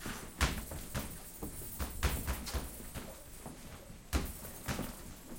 Boxing gym, workout, training, body bags
Stereo recording of heavy bags in a gym.
Left = close-mic
Right = room-mic
bags, punching, boxing, body, training, gym, workout, heavy